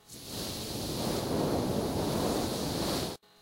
This is a recording of a can of air being blow into a microphone in hopes of producing a "steam escaping" type of sound, It sounds right to me. Enjoy!